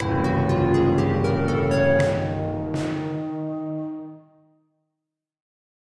Spooky/Scary Transition Sound
I'm back with another fl studio creation. This time it's a short transition sound made with a piano hitting a low key, a drum kit, some synth and violins.